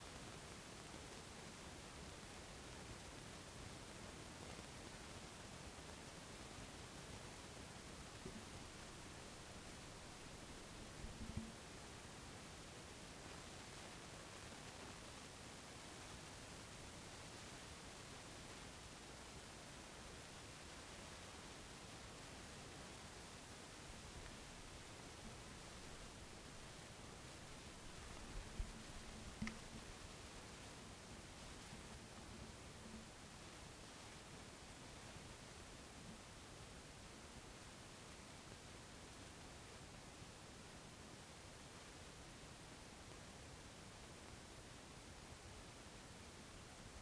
I was making a short recording with my Zoom H1 and one of the stereo channels only recorded this noise.
To be fair, this was only after quite a few years of service and a few drops on varied surfaces...
Now I know what to ask Father Christmas...